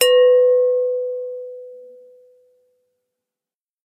Glass Bowl 3
Large-sized Pyrex bowl struck once with a fingernail. Recorded with a 5th-gen iPod touch. Edited with Audacity.
bell, bowl, chime, ding, glass, ping, pyrex, ring, ringing, strike